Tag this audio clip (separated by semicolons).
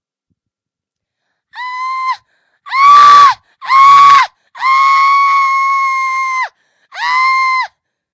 woman; cry; scream; pain